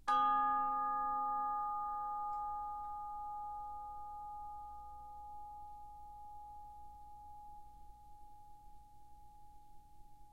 Instrument: Orchestral Chimes/Tubular Bells, Chromatic- C3-F4
Note: G#, Octave 1
Volume: Piano (p)
RR Var: 1
Mic Setup: 6 SM-57's: 4 in Decca Tree (side-stereo pair-side), 2 close
bells, chimes, decca-tree, music, orchestra, sample